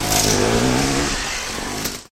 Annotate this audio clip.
engine model high revs
Vehicle's engine high rev noise
high-rev, engine, car, vehicle, idle